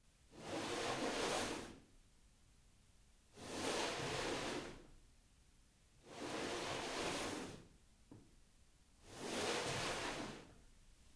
I dragged something on the floor to make it look like a corpse being dragged